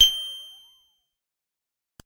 A retro video game reload sound effect.
game, nes, reload, retro, video